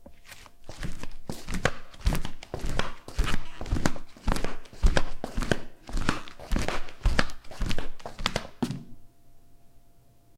footsteps flipflops
Walking around my apartment in a pair of flip flops. Recorded with Rode NTG-2 mic into Zoom H4 and edited with Spark XL.
feet, flip-flops, floor, foley, sandal, steps, walk